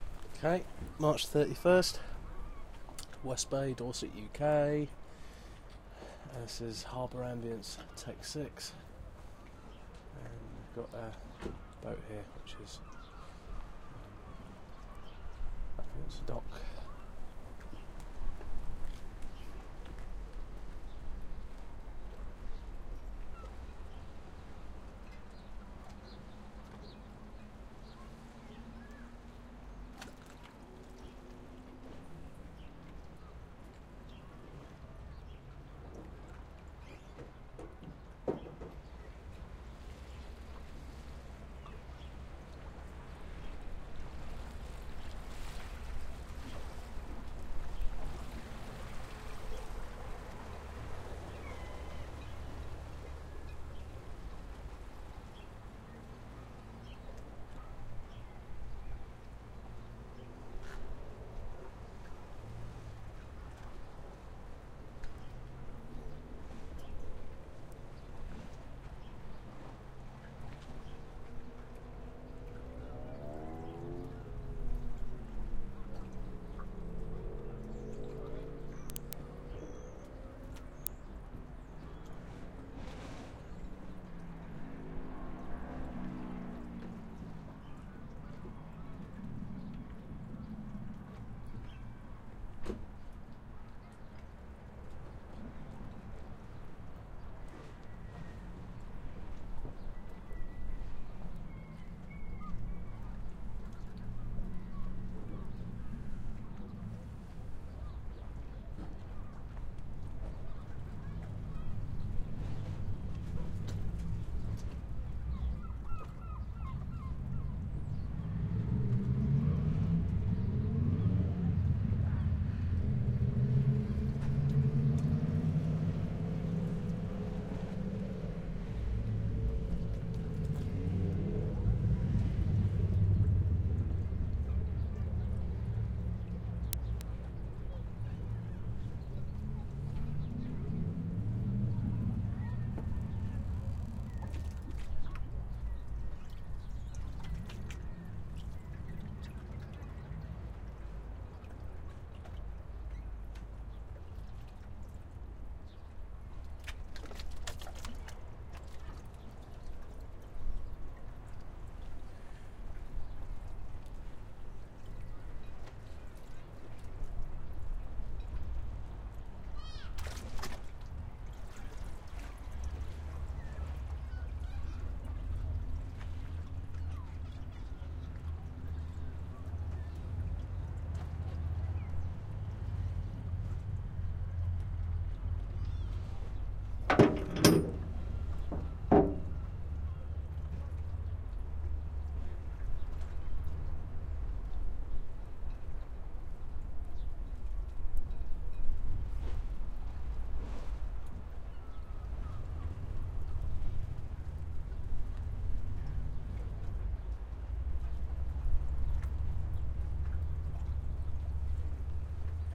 CFX-20130331-UK-DorsetHarbour06
Small Harbour Ambience